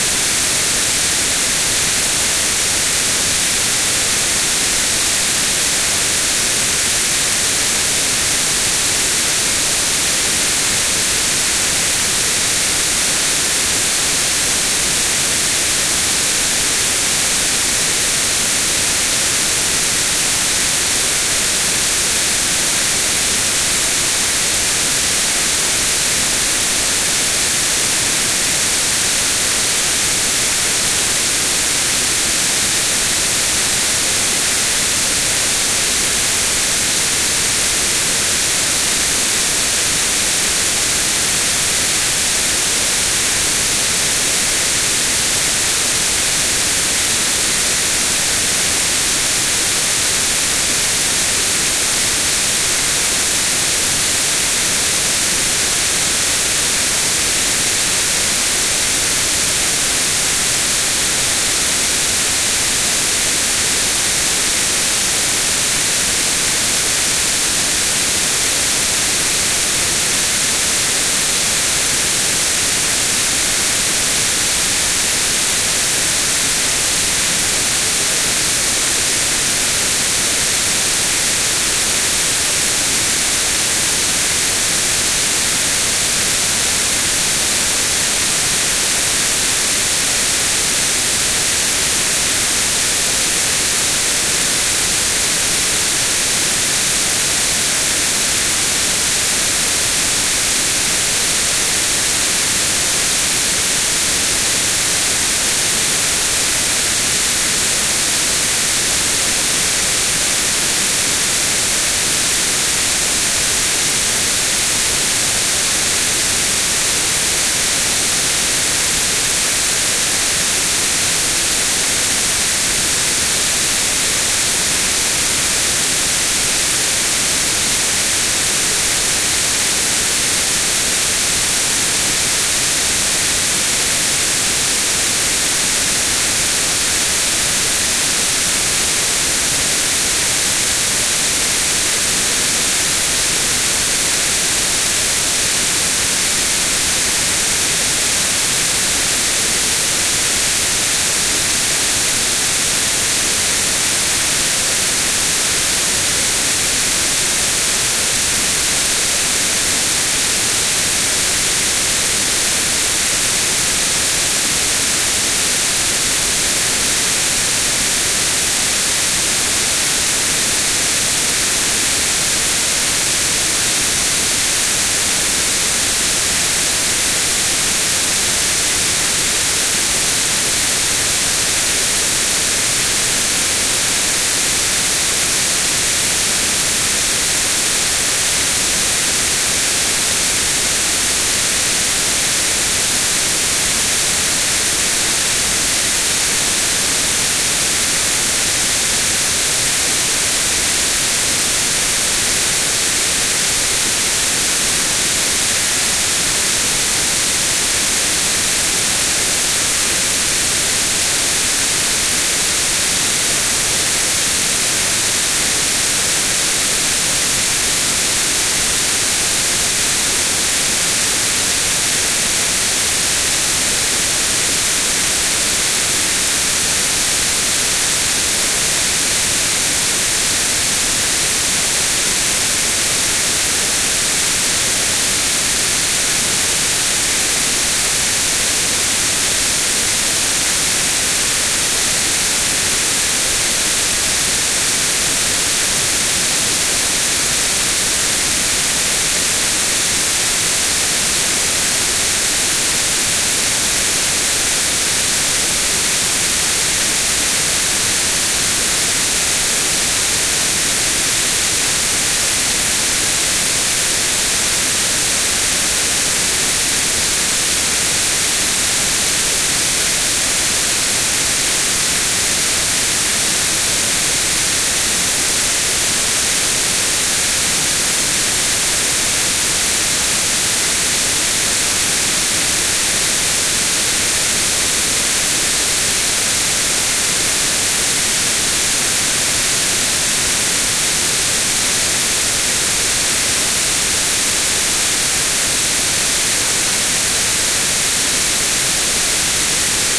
5 minutes of White Noise
ambiance, ambience, ambient, atmosphere, background, background-sound, general-noise, noise, white, white-noise